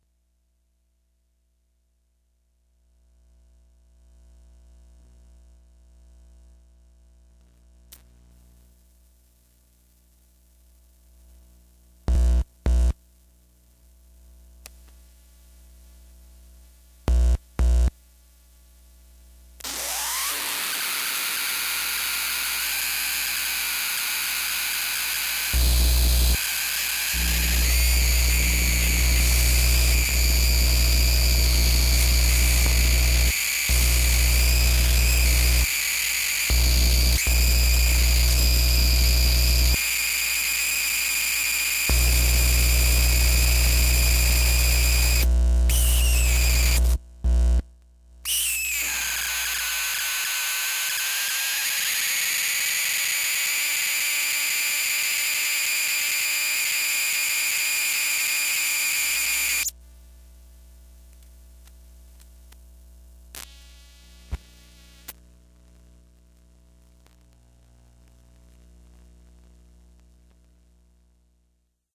Bought an old Sony Walkman from an antique mall. It was advertised as "Radio Works, but Cassette Player Does not Play." This was true, and I was surprised at the noises it made when you tried to play tapes, though whether or not you have a tape or not it makes these types of noises when you switch it to the Metal/Chrome setting with Dolby B on. You can add a 60 hertz hum if you touch any of the metal parts, and can somewhat mess the sound by messing with the spindles as they move.
I may upload some more samples of this at one point, with cleaner recording of each of the sounds you can create.